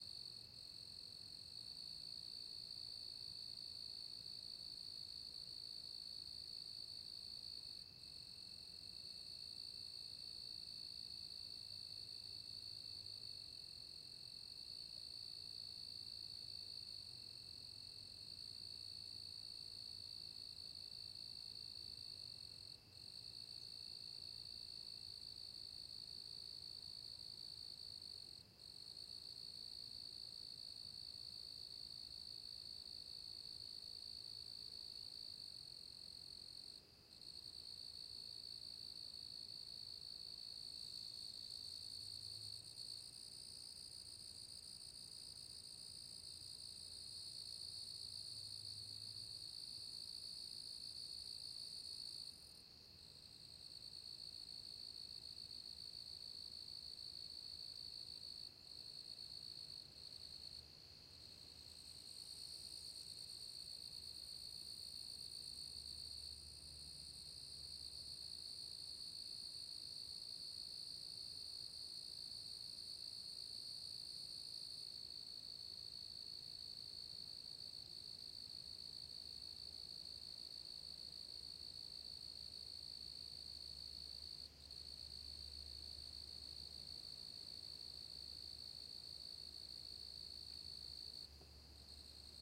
HUDSON sept crickets close stops restarts F
front pair of 4 channel recording on H2. some crickets in close perspective. stops and starts.